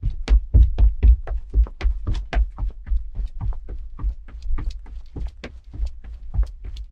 Running on a carpet over wood floor
carpet, fast, floor, footsteps, run, running, shoes, sneakers, steps, wood